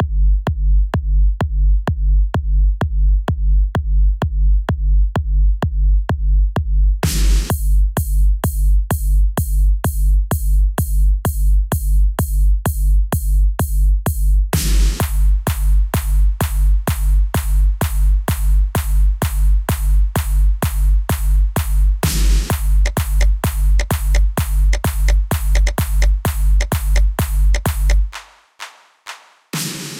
Big Room Drums 128bpm G 16 bars
Took a few samples and put them together... first just a simple bass kick in the tone G, then a ride, then a clap and a percussion beat.
Made in FL studio 11 Recorded with Edison.
128bpm, bass, drum, hard, sub, dance, 16bars, kick, bigroom